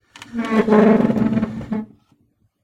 Chair-Stool-Wooden-Dragged-11
The sound of a wooden stool being dragged on a kitchen floor. It may make a good base or sweetener for a monster roar as it has almost a Chewbacca-like sound.
Ceramic, Tile, Stool, Pushed, Drag, Roar, Pulled, Pull, Dragged, Snarl, Monster, Push, Wooden, Wood, Kitchen